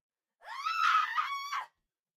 Female screaming in fear.
Female, Loud, Scream